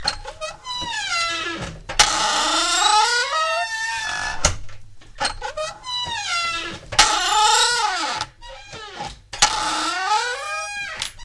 Groaning sound of my basement door